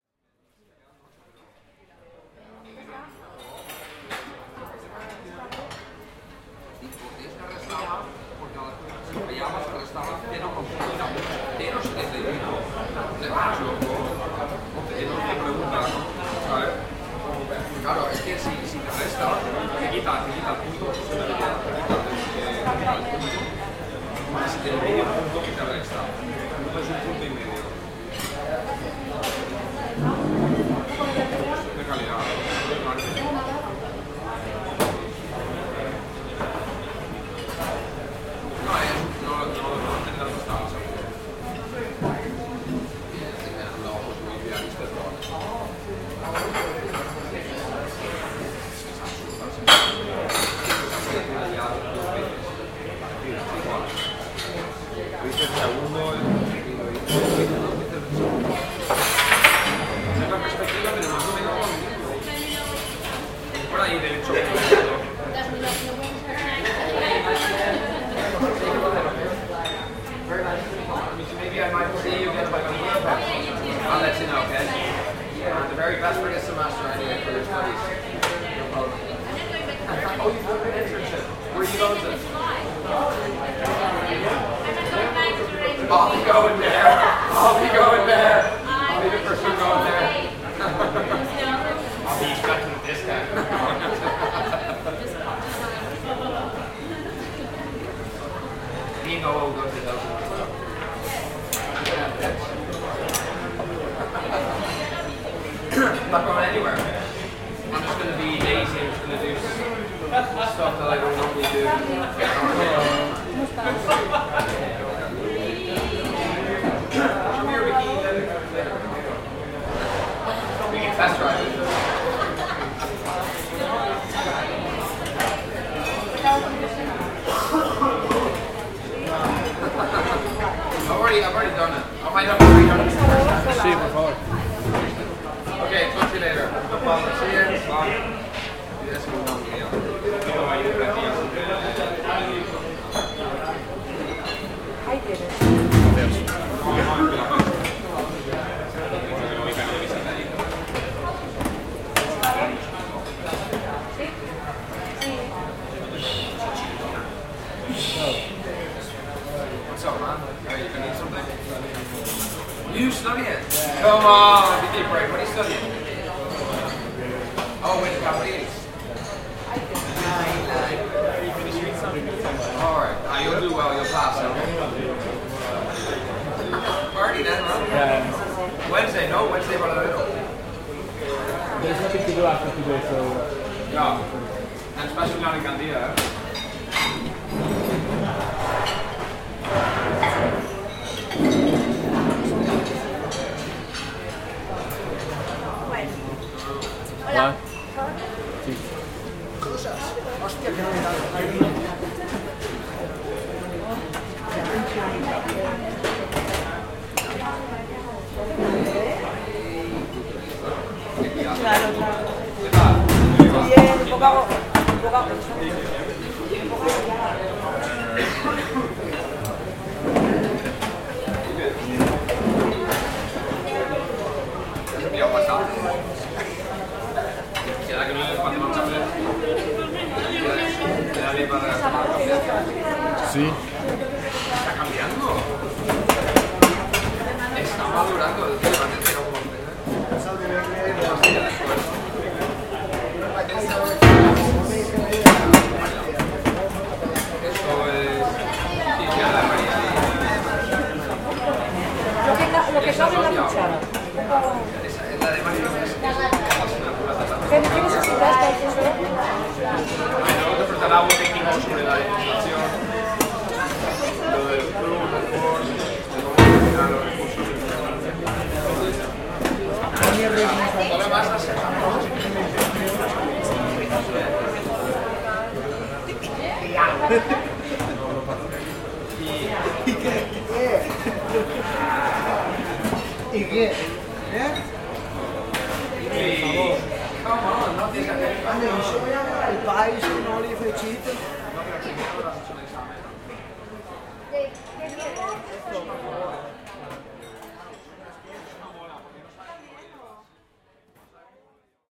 Ambient sound inside cafe dining
Soundscape recording from inside the dining room of a cafe on the table menus, 13:00 to 14:00 h.